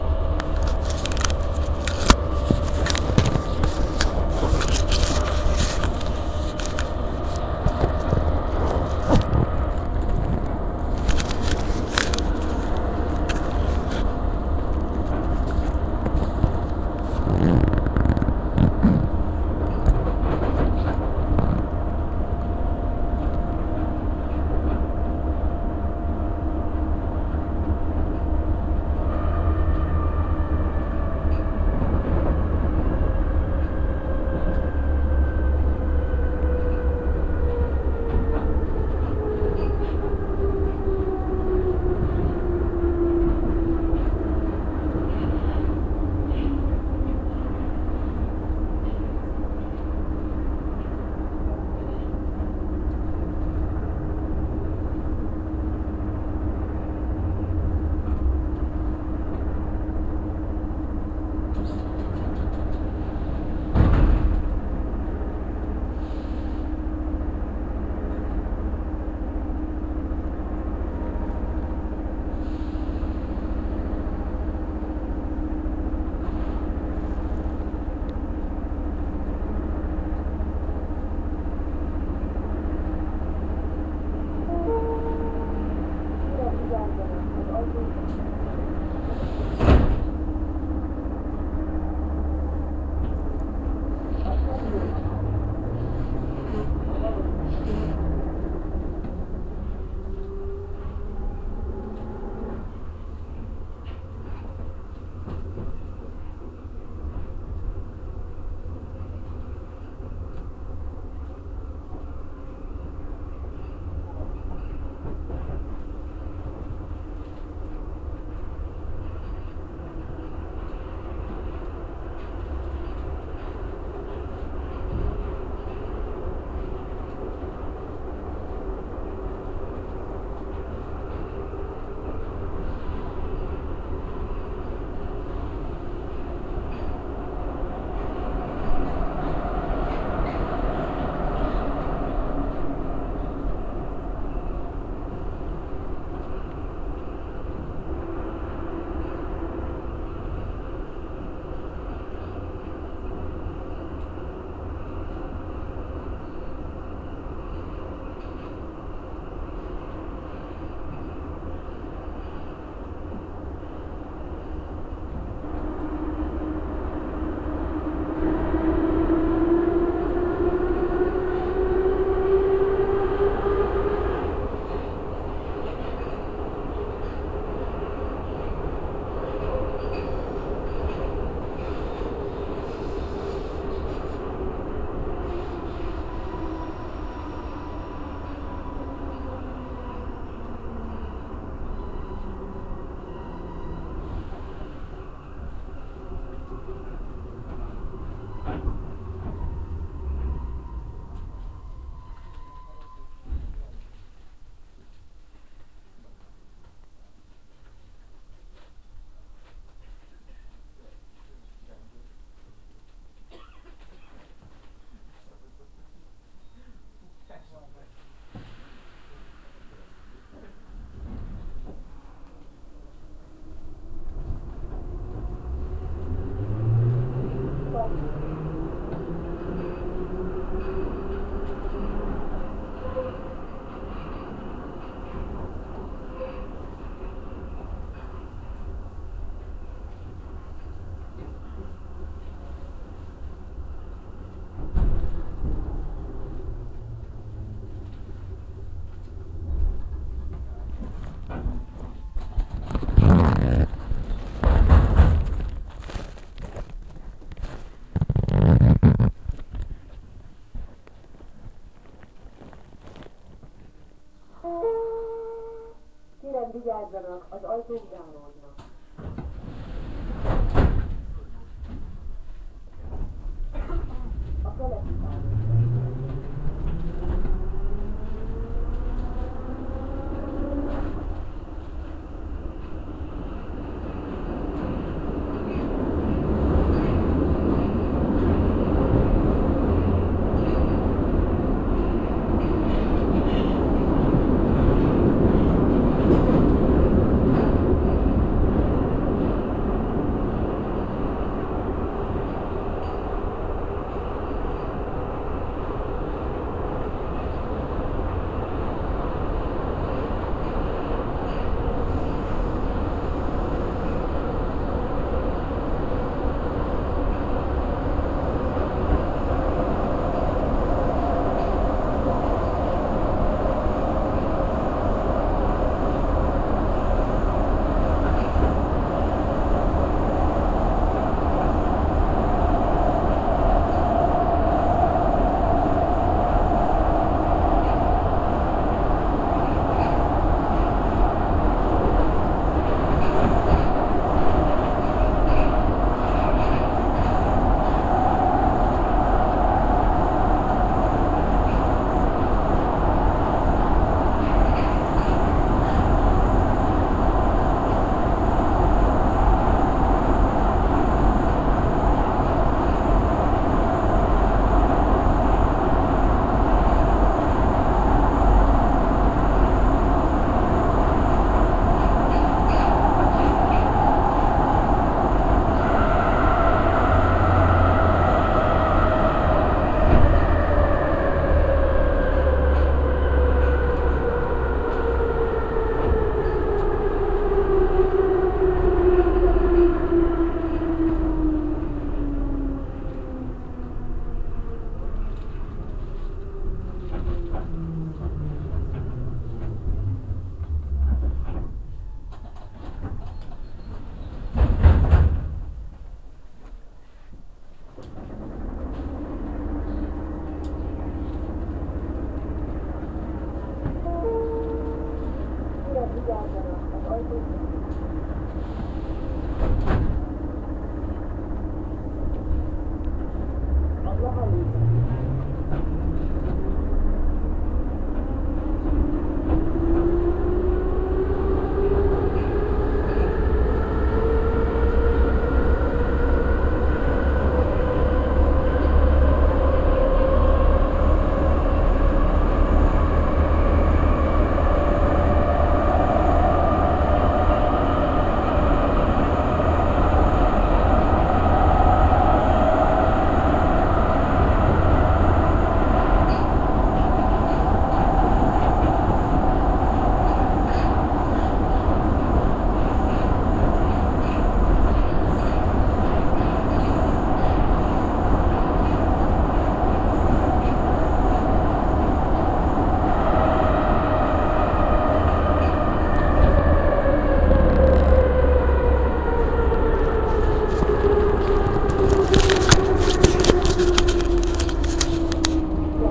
EV-TRAIN-MOVING-SOUNDS
I recorded these sounds during travelling on the Metro Line 2 in Budapest, from station Örs Vezér Tere to station Blaha Lujza tér. I started recording sounds when the train was slowing down to the second station, Pillangó utca, and the recording is ended when the train was arrived to the station 'Blaha'. Going into the deep at 1:40, the train is going very slowly into the tunnel. At 2:16 There is another train which is going fastly on the backward railway in the tunnel. It has great echo sounds. The sound is an ideal looping sound for slow-moving, I think. At 2:48 the train was accelerating a bit and you can hear cleanly the looping motor sound. at 3:23 the train stopped in the tunnel for 20 seconds, then arrived to station Stadionok. At 4:33 it is moving to the next station, Keleti Pályaudvar. I like hearing at 4:47 and 4:55 at any time when it is going onto the rail-crossings. The station Keleti Pályaudvar is much deeper then the station Stadionok.